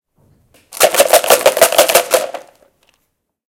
mySound SPS Isolde
Sounds from objects that are beloved to the participant pupils at the Santa Anna school, Barcelona. The source of the sounds has to be guessed.
Belgium, Isolde, Ghent, Stadspoortschool, mySound, CityRings